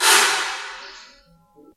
Bonks, bashes and scrapes recorded in a hospital at night.